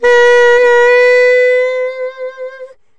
The second sample in the series. The format is ready to use in sampletank but obviously can be imported to other samplers. This sax is slightly smoother and warmer than the previous one. The collection includes multiple articulations for a realistic performance.